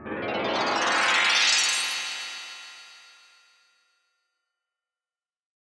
Alien windbells up
The sound of a spell forced upon an enemy by a magician. Raising pitch.
bomb, spell, treble